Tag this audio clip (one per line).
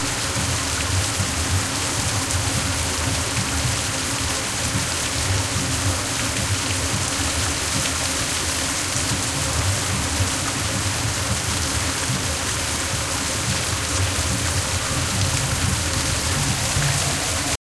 animals
field-recording